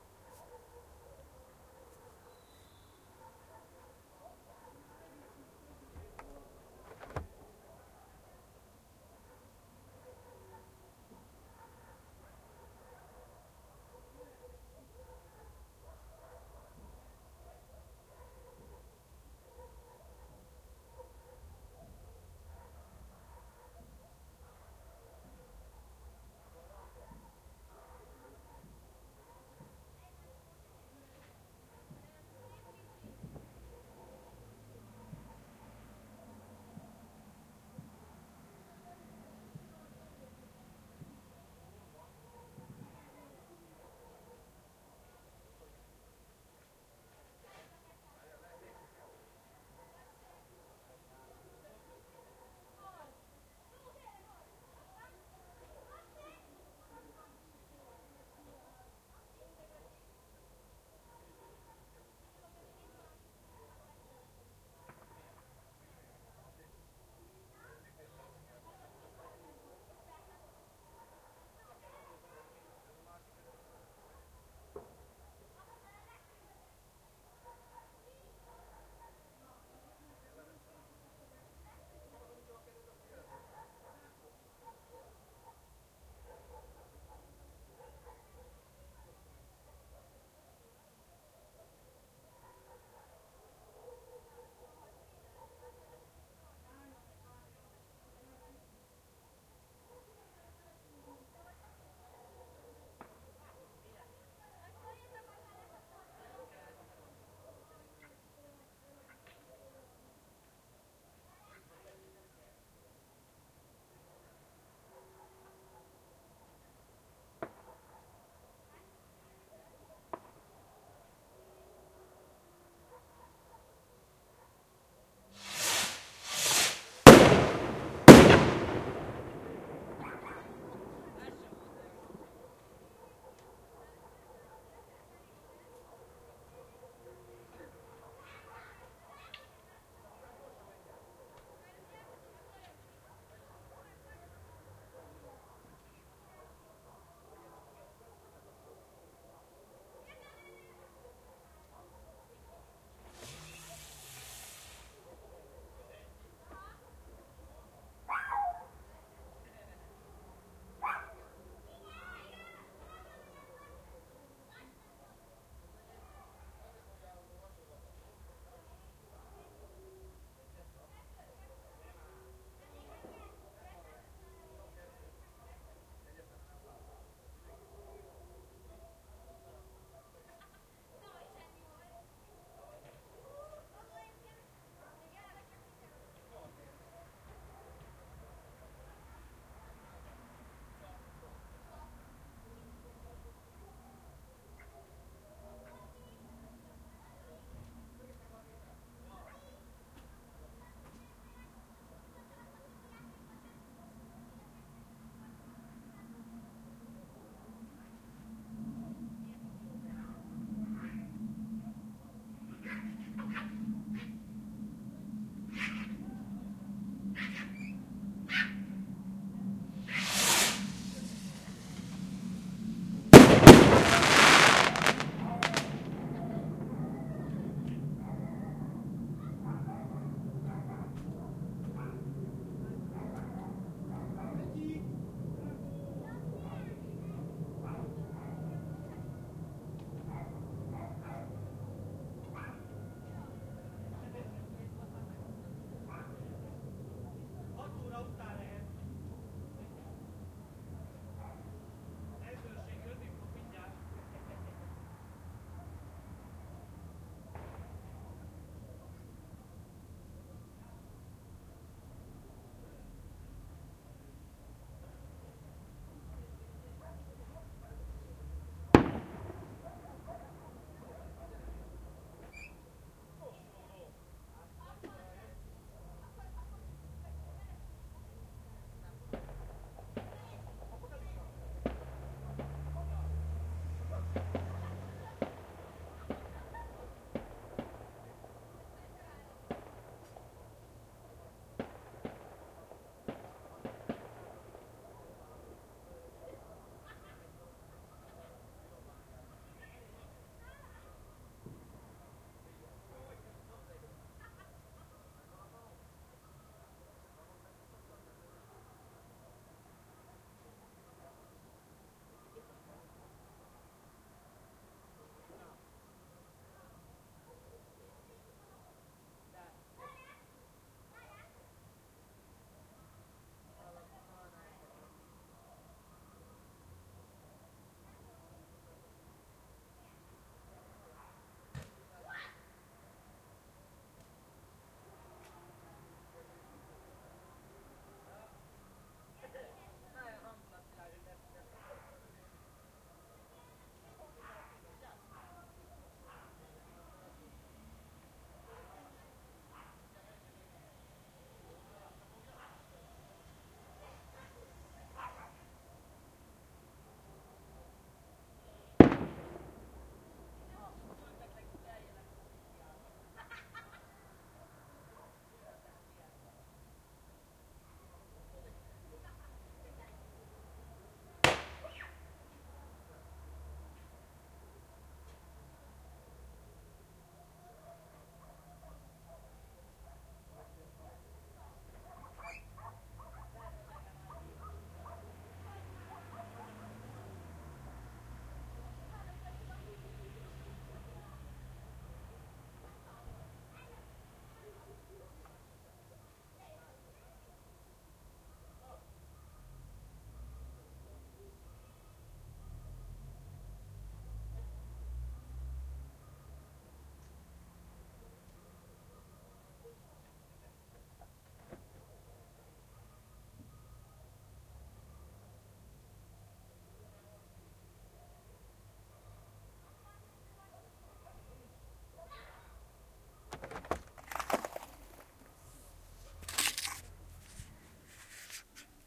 Some firework launch 1
Some firework launch recorded by SONY STEREO DICTAPHONE in Pécel, on 31st December 2011.
year, fireworks, firework, new, rocket